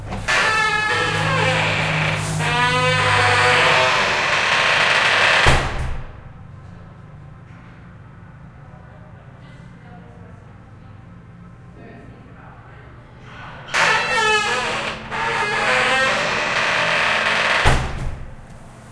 Squeaky door leading to a city street opening and closing twice
city, close, closing, creak, creaky, door, hinge, open, opening, squeak, squeaking, squeaky, street